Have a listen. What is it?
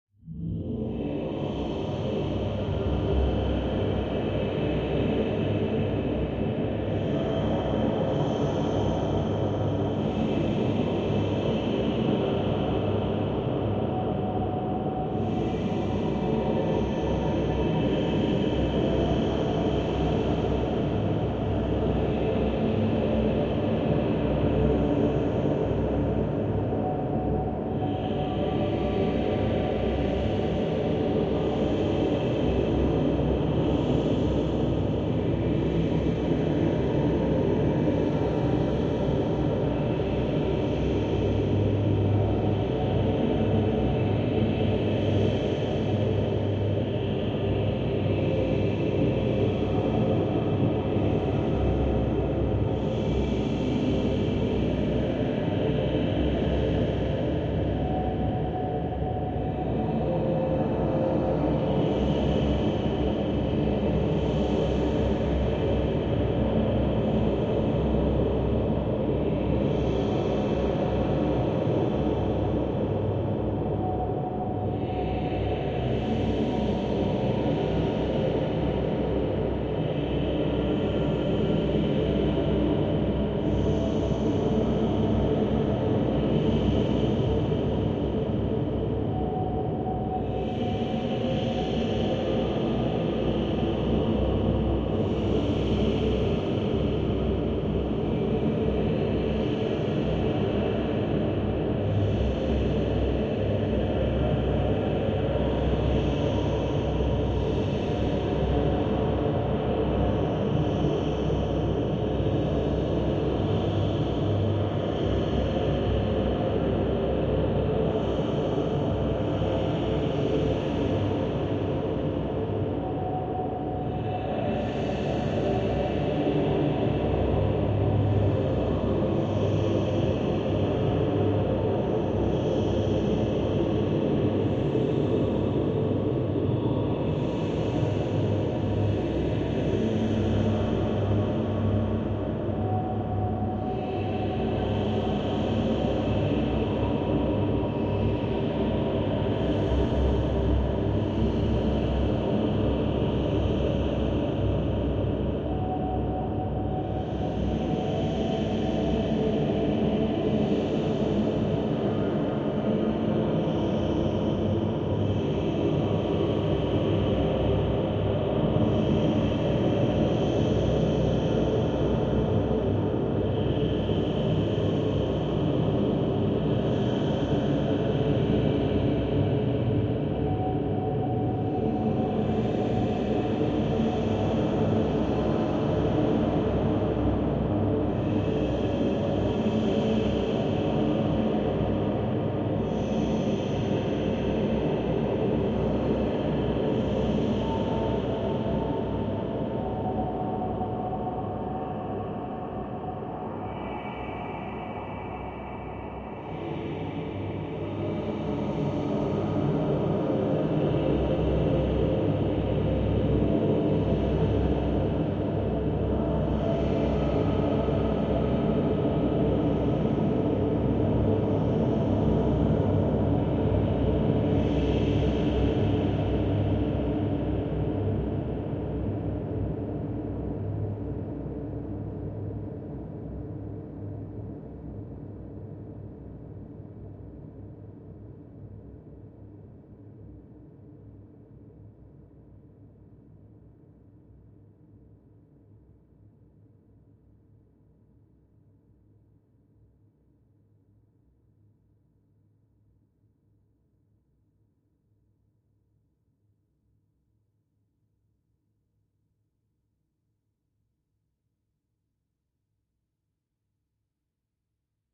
hell's choir (sfx)
abstract sound-design with lots of effects used.
abstract, effect, effects, fx, massive, multilayer, pad, strange